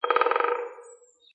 Stereo sample of a recording of a woodpecker in the forest near Waalre (NL), which cann be used as notification sound on your cellphone.
specht, ringing, woordpecker